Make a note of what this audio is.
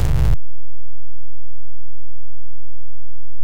Viral Noisse BD
Abstract, Noise, Industrial
bass, drum